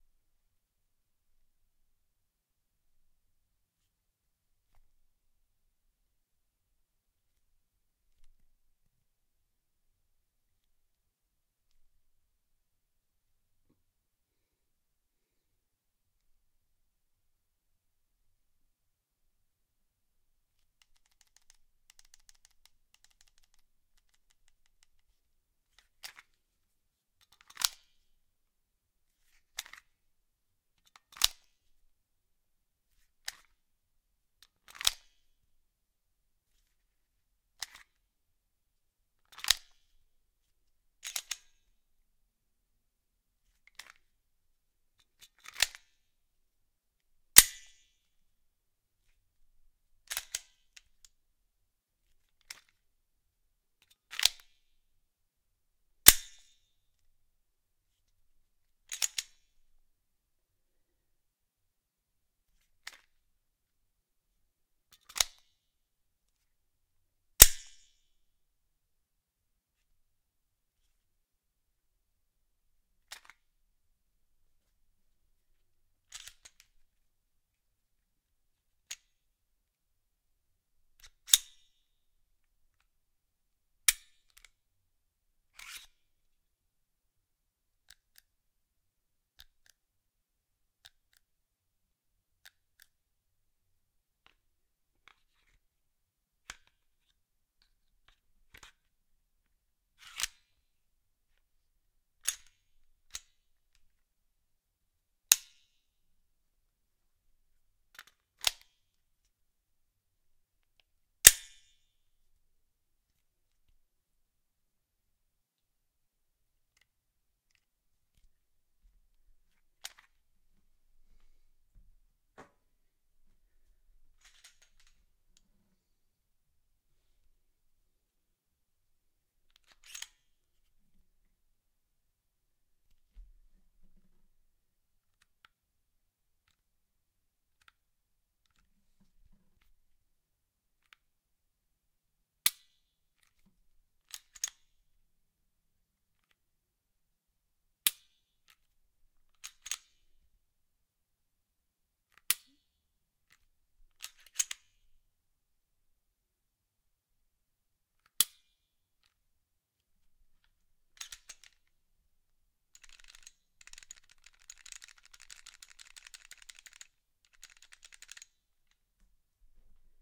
S&W M&P®9 M2.0™ handling (actual: room)
This is the M&P®9 M2.0™ (Military and Police) from Smith & Wesson, it's a recent updated 2017 pistol from the same company that made the famous .44 revolver from "Dirty Harry". Now they make lighter 9mm polyer striker fire handguns (as in no hammer to cock back) that a lot of people use including some US police departments, LAPD (phasing out), DEA; even champion world class shooter Jerry Miculek uses a slightly modified variant. It's also a popular choice for personal protection among other boring regular people.
These sounds are recorded differently from other offerings: echo room style. I think there's enough gun handling sounds recorded in a crystal clear sound booth. Not all gun fights are clean and outdoors. Sometimes you're doing a short film action sequence inside a tight house that usually has some reverb and your slide releases and reloads with no echo as you hear people yelling down hallways, it can sound out of place.
reload, tactical, wesson, polymer, smith, handgun, magazine, gun, cock, reverb, room, smith-and-wesson, pistol, weapon